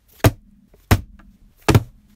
tapping on book